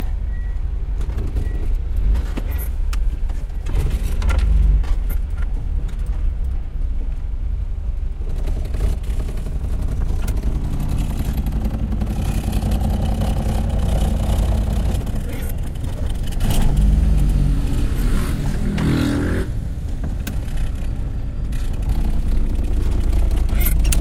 Automovil viejo
grabación de un carro del año 1992